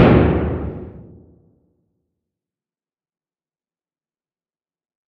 uncompressed cannon
A miniature cannon firing.
artillery, bang, bomb, boom, cannon, explosion, explosive, kaboom, pow, shot